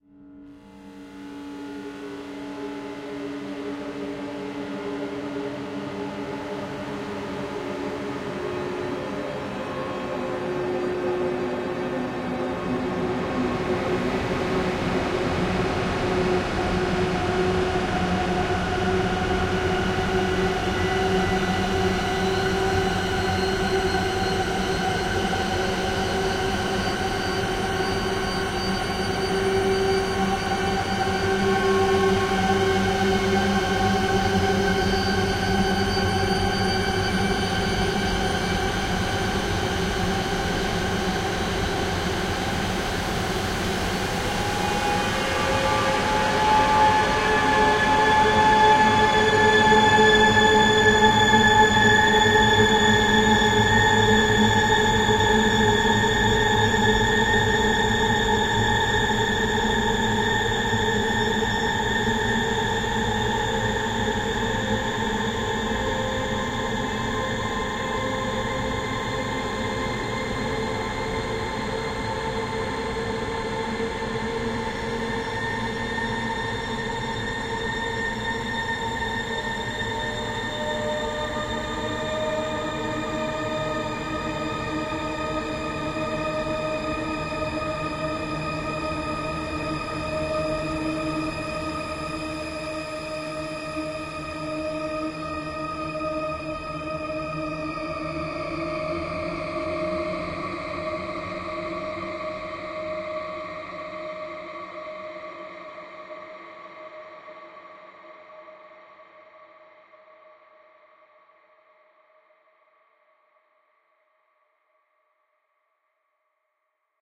demons-and-angels
Created using Ohmicide feedback generation and ValhallaShimmer reverb